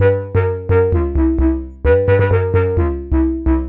High Resonance Bass Pattern 3
bass, dark-bass